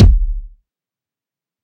Kick Drum (Lord Lokus)
Kick Drum by Lord Lokus
Several Kick Drums layered with FL Studio 12
Drums, Sample